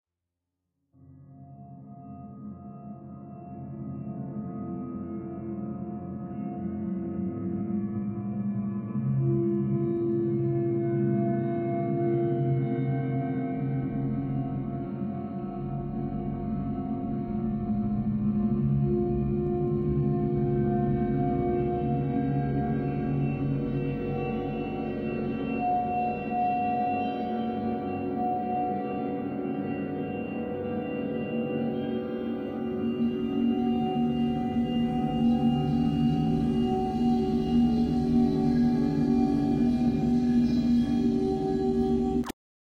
Creepy ambiance. Created by passing a happy original folk song through some acoustic mirrors in Sony Soundforge (back when that was a thing). The results terrified me, so I had to share it.
Edit: Thanks for all the positive comments over the years! It makes me so happy to see this getting used all over the place.
eerie, horror, scary, synth